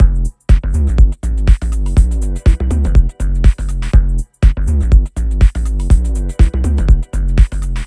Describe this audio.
Deep EDM Loop by DSQT 122 bpm
I did this on a Sunday morning. It has a Sunday sound to it. It also has a Sunday-ish tempo of just 122 bpm. Some sort of bass filled EDM music loop which I hope you'll enjoy!